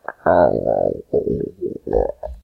A clear sound of a rumbling stomach. Highly recomended to be cut a little.